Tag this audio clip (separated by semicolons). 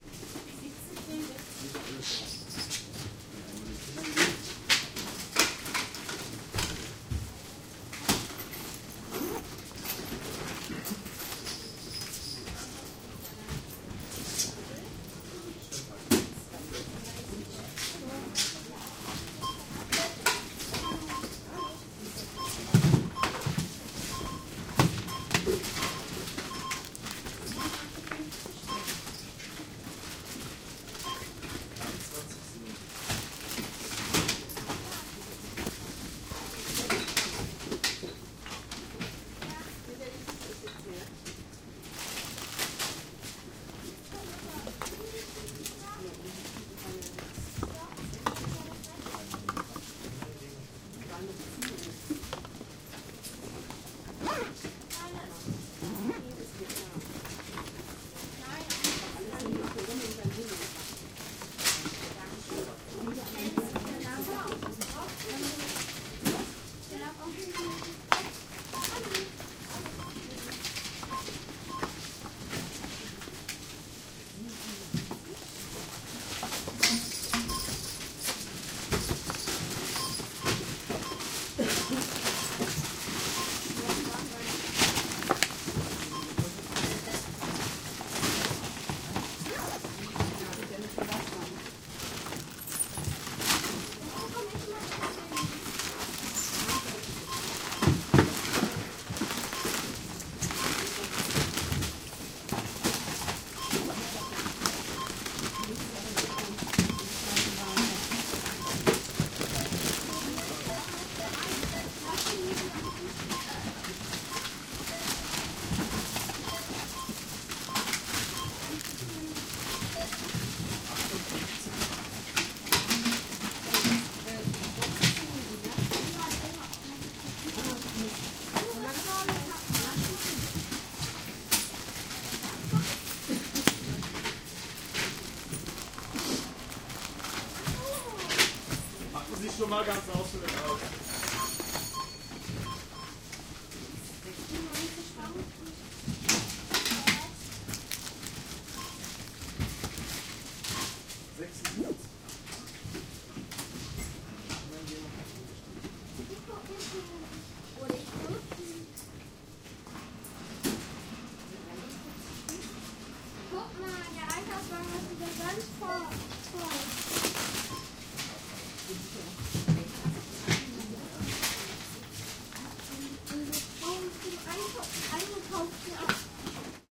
aldi; beep; berlin; buying; cashier; field-recording; foodstuffs; groceries; people; shopping; store; supermarket; talking; trolley; voices